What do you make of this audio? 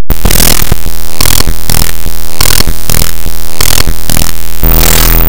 check your volume! Some of the sounds in this pack are loud and uncomfortable.
A collection of weird and sometimes frightening glitchy sounds and drones.
The power's out and all systems are offline, then the emergency backup program flickers into life.
Created in audacity by importing a bmp file into audacity as raw data
audacity, buzz, computer, data, glitchy, machine, raw, robot, science-fiction